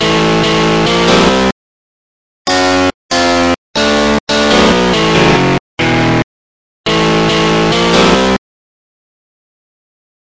crazy guitar
an electric guitar mini-piece
electric-guitar, heavy, heavy-metal, overdrive